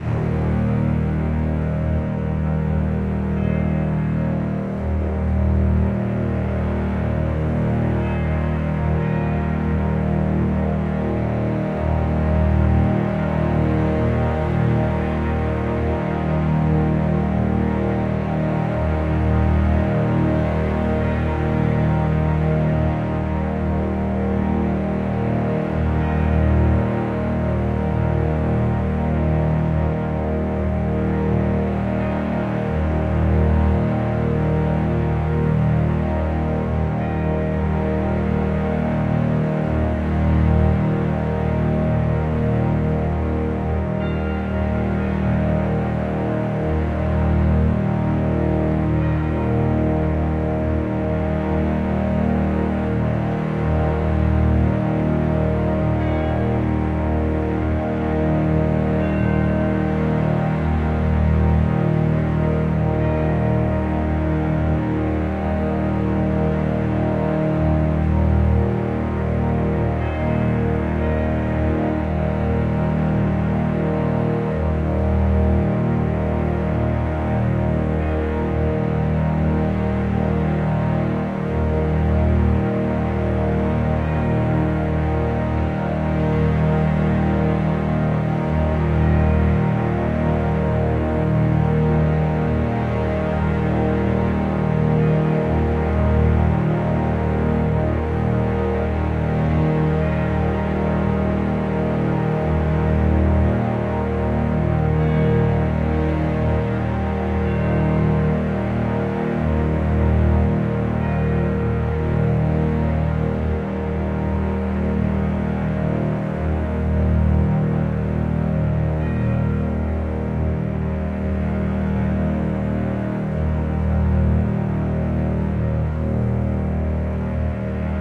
Forest Meditation
Deep meditation, flow and wind, a moment to myself.